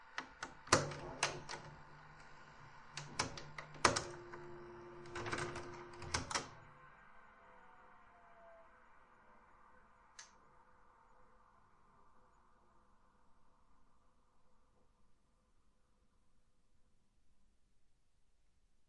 Recording of a Panasonic NV-J30HQ VCR.
pack, recording, vcr, vhs, cassette, retro, tape, loop